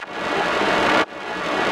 Big Wheel2 140
broken, electronic, experimental, industrial, noise